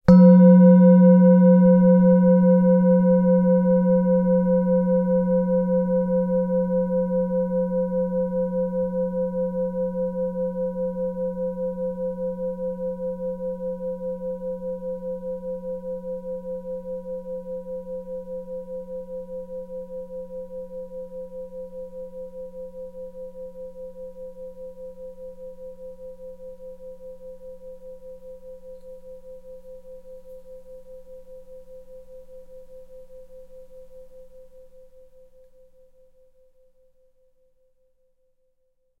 singing bowl - single strike 2
singing bowl
single strike with an soft mallet
Main Frequency's:
182Hz (F#3)
519Hz (C5)
967Hz (B5)
Zoom-H4n, record, soft-mallet, mic-90, singing-bowl